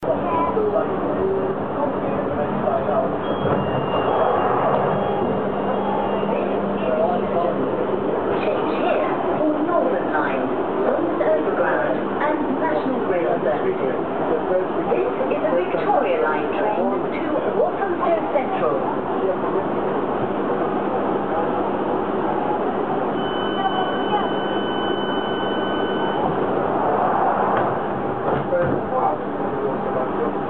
New London Underground Victoria Line Euston PA
A new version of the Victoria line announcement for Euston as heard on the 2009 stock. The new door closing beep can also be heard
pa, tand, recording, lu, doors, line, closing, new